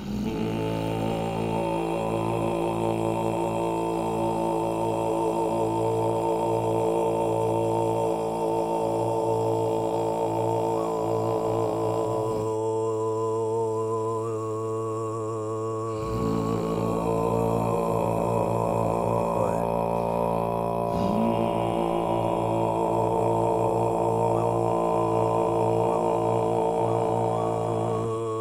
Throat singing with few layers
vocal, overtone, throaty, male, voice, throat